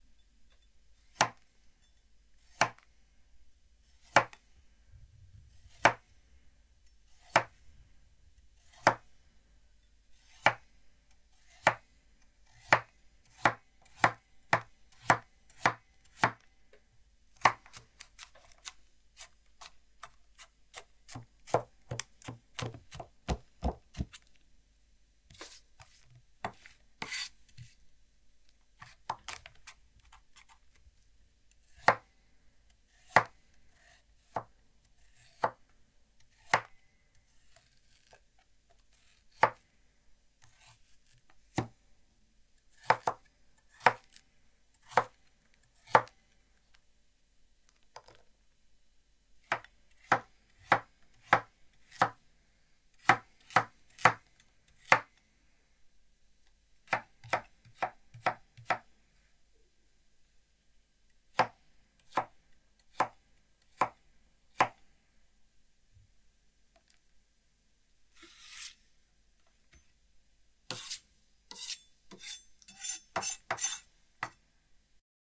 Chopping carrot
The sound of a kitchen knife chopping A carrot, scraping sounds, big chops as well as small chopping.
Chopping, Cooking, Food, Kitchen, Preparation, Vegetables, Knife, Household, OWI